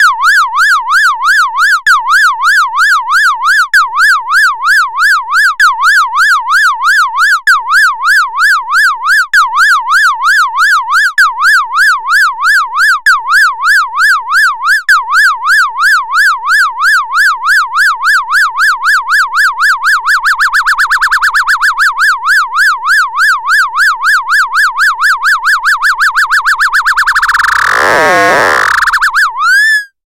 Moog LFO Siren
Synthesized police siren imitation made with Moog Little Phatty Stage 2 synth.
FX, LFO, Moog, Police, SFX, Siren, Synthesizer